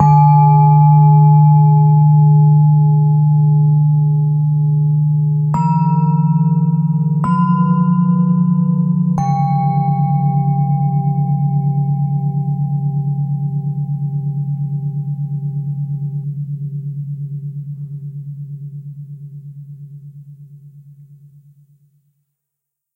a simple bell tone sequence. G# A# B G